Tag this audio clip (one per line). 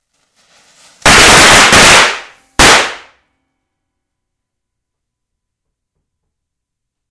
shot
gun
burst
fire
single
loud